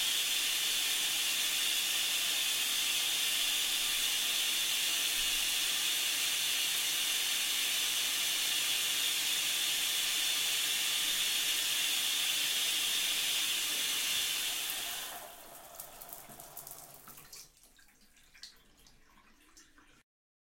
turn off shower.
off, shower, turn